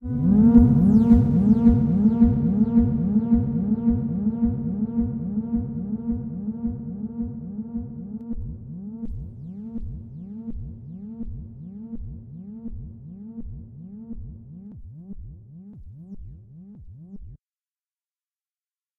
filtered lo-frequency synth shot with simple stereo delay